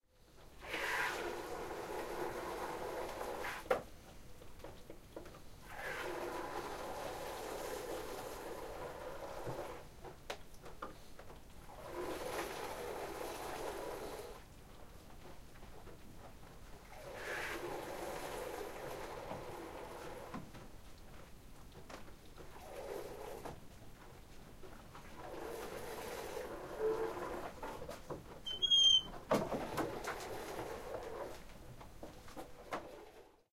goats milking in plastic bucket 8 + door queak
Farmer milking a goat by hand. Bucket is half-full of milk. Goats and bucket stands on a wooden platform. At the end of the sound clip the farm's old wooden door squeaks.